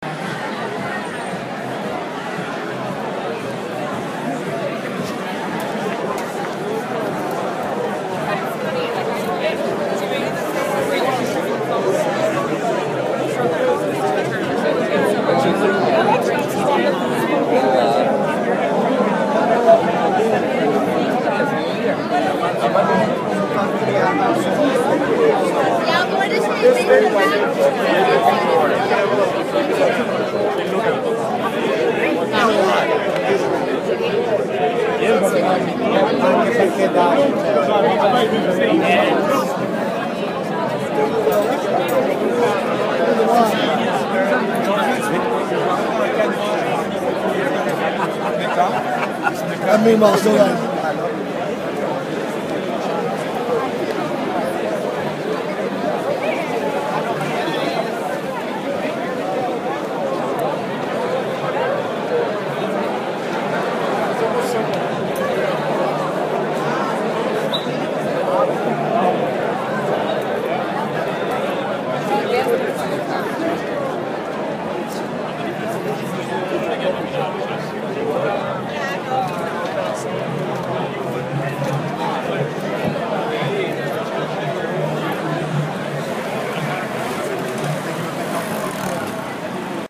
Outside bar packed with hundreds of people
Outside bar in Cannes packed with hundreds of people, advertising festival